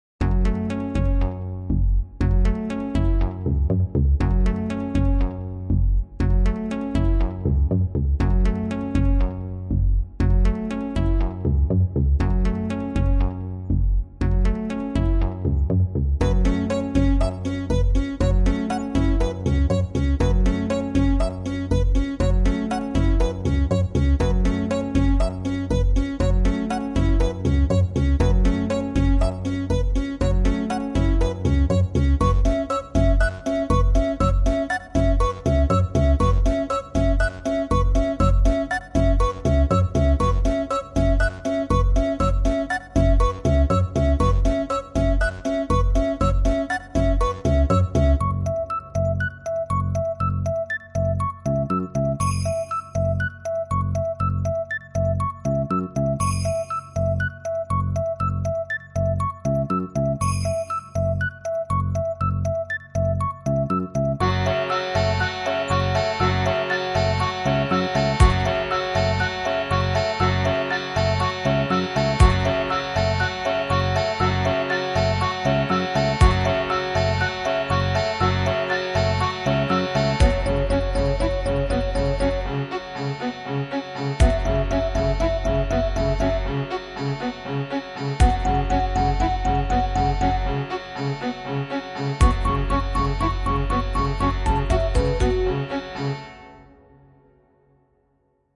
One thousand suspects
This is the first song I made with 1BitDragon. I wanted to make a police game but it didn't go so well so hopefully you guys can make better use of the songs I made.
Cheers
crime, music, 8bit, police, free, intro, song, detective